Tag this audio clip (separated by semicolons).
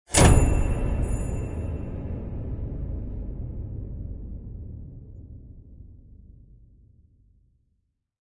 thump; shimmer; sound; smash; thick